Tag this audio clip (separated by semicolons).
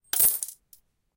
coins; videogame